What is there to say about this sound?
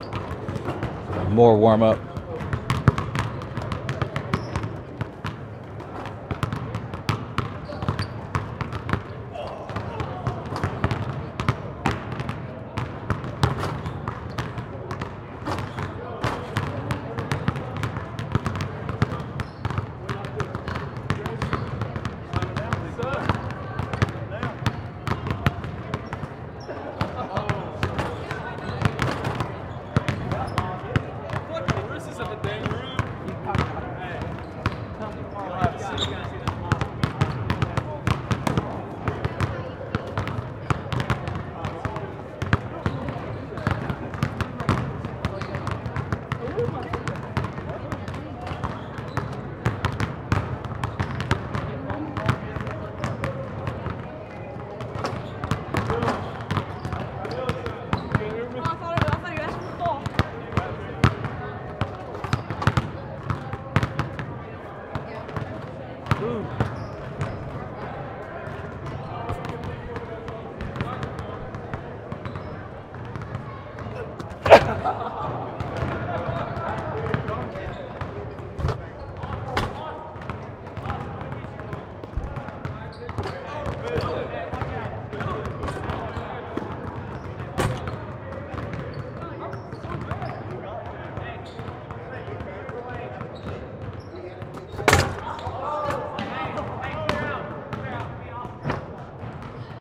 BASKETBALL WARMUPS 2A
Team warms up in gym with small crowd. Sennheiser shotgun, Tascam 60d.